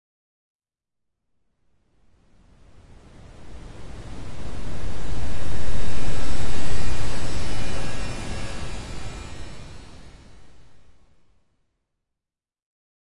Static Surf
Pad sound that is almost a cross between white noise and a wave hitting the shore.